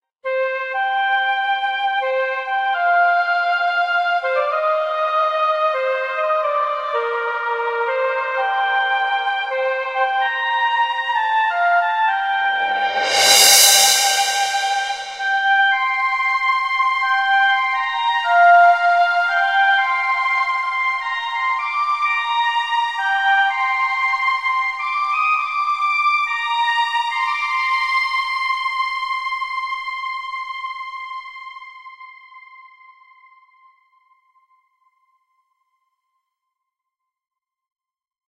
Hope of Rebels
Woodwind, Strings, Ambient-Strings